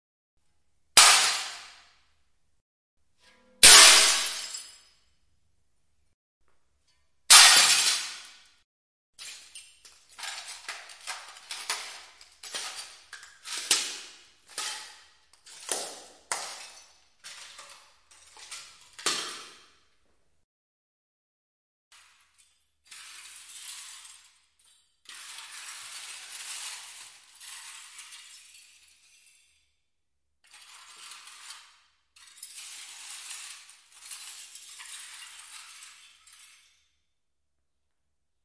Break Mirror004
44.1/16bit
Breaks huge mirrors.